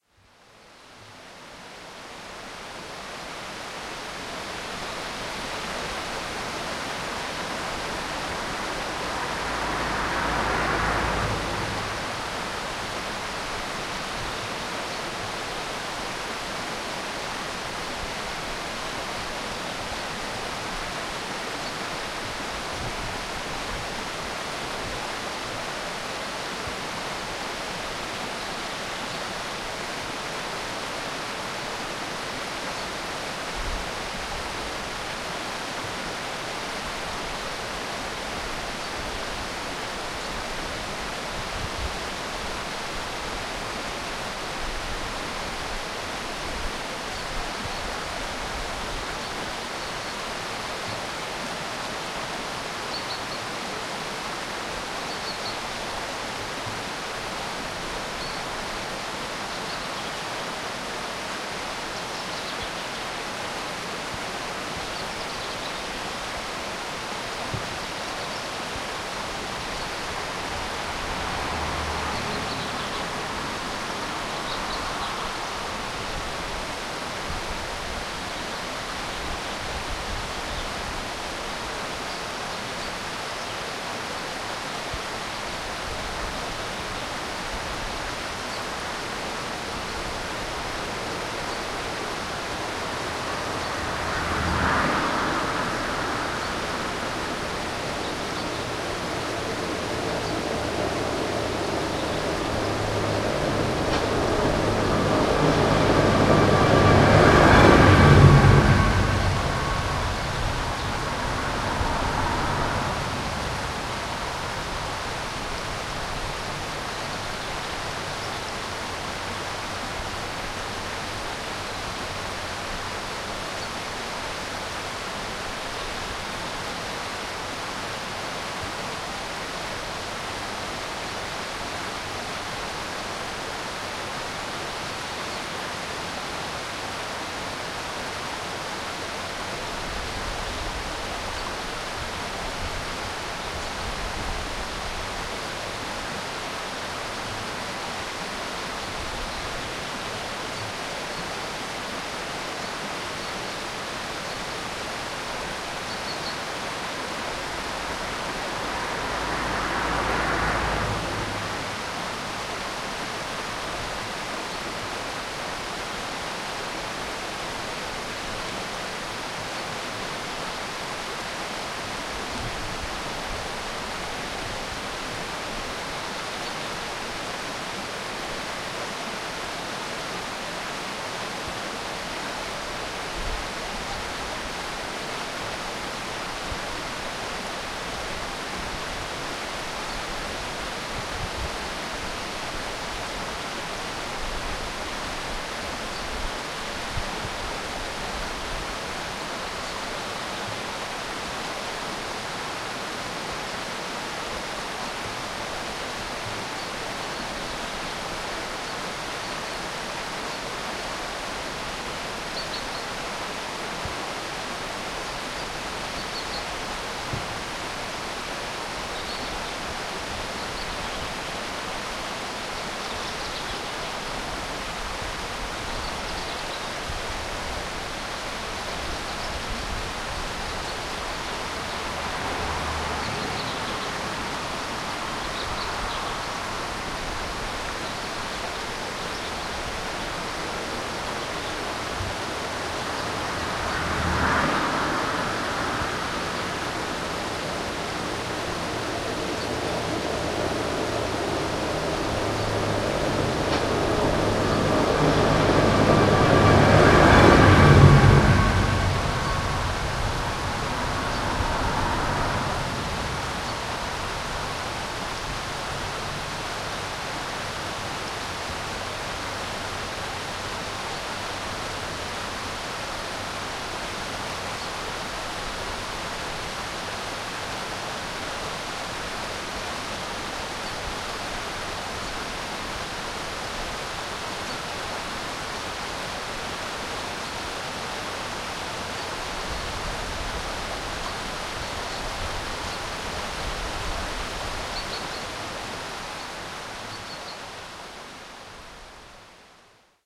03.River-Brogaig
Recording of river Brogaig from a bridge on the A855 near the Staffin Post Office. It's a recording of the river with some occasional bird chirping, cars passing and a tractor.
water, roadside, river, road